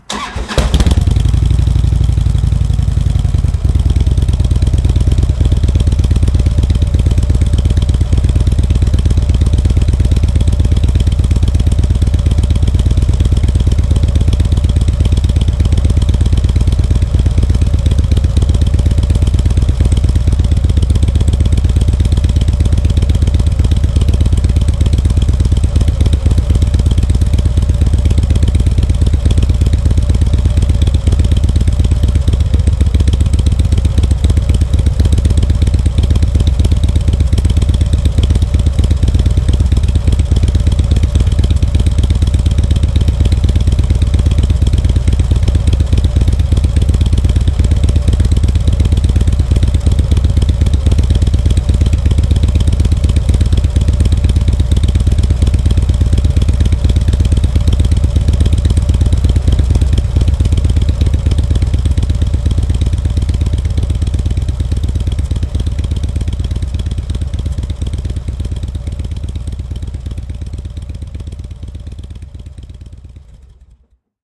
Harley Idleing
This is the sound of my FLSTC starting up and idling. Recorded on a Sony IC Recorder and edited in Acoustica Premium 5.0.